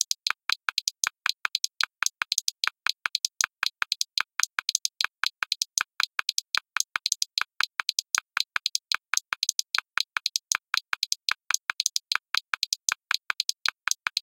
Random Beat Loop 1
I created these Drum Beat/loops using Audacity.